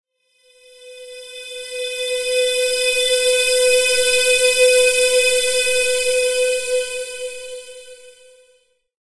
Eee Tard
Pad sound, with a vocal-like timbre to it. Hard "EEE" sound.